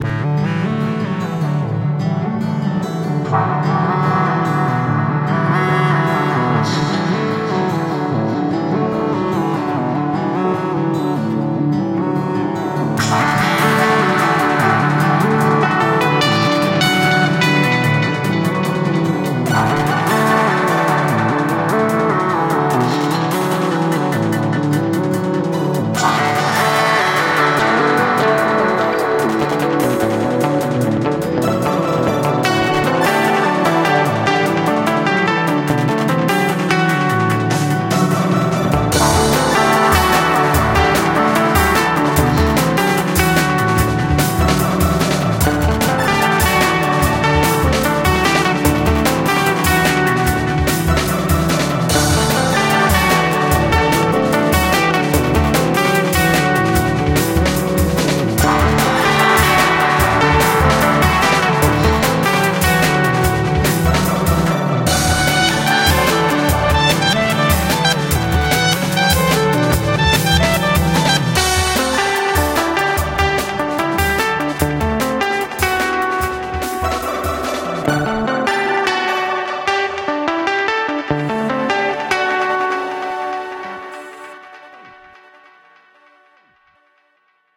OHC 495 - Clouds
Clouds Acoustic Electronic Guitar Flying Glitch Experimental Vocoder
Electronic, Flying, Acoustic, Clouds, Vocoder, Guitar, Glitch, Experimental